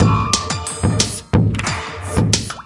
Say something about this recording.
Drums loop 180BPM-01
drums, loop, 180bpm